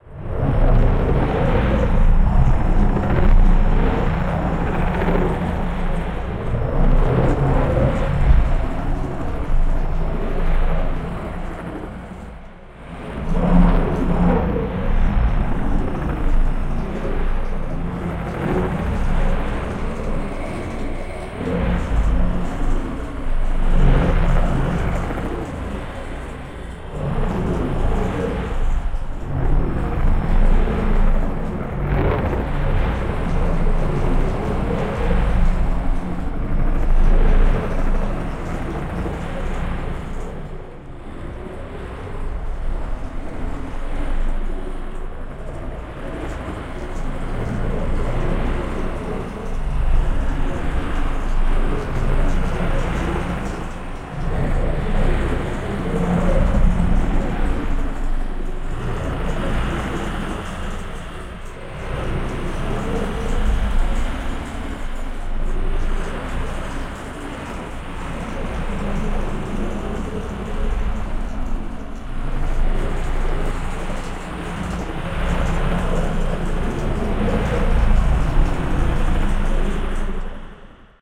09 - FINAL combo (06, 07, 08)
8th step of sound design in Ableton. It's the three previous samples recorded together
sfx, strange, sound-design, weird, freaky, sounddesign